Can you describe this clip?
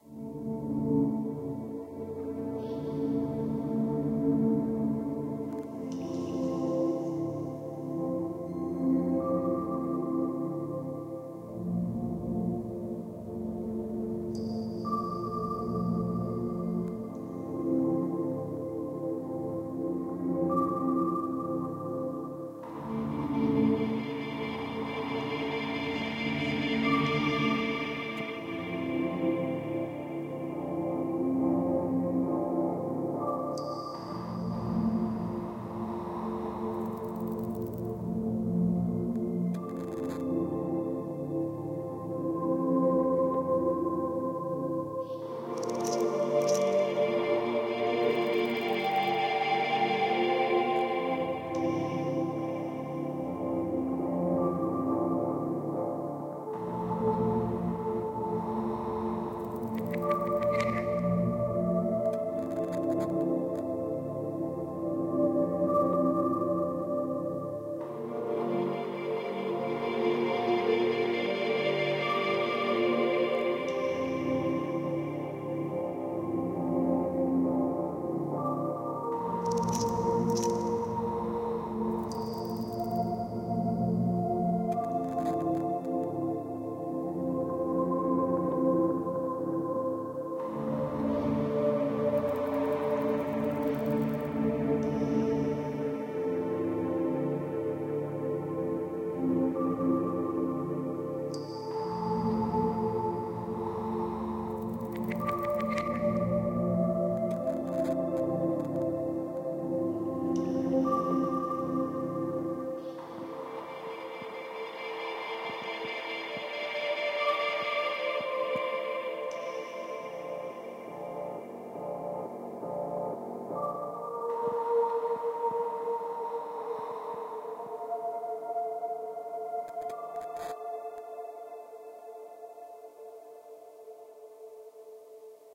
A little atmospheric track that I made using NI Massive, Ableton Live and processed ASMR samples.
Ambient, Dark, Cinematic, Atmospheric